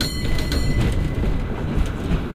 SF Cable Car bell ringning once
SF Cable Car bell ringing